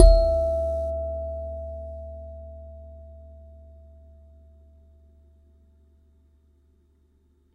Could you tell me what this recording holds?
Sansula 09 E'' [RAW]
Nine raw and dirty samples of my lovely Hokema Sansula.
Probably used the Rode NT5 microphone.
Recorded in an untreated room..
Captured straight into NI's Maschine.
Enjoy!!!
sansula, percussion, one-shot, mbira, raw, metal, tines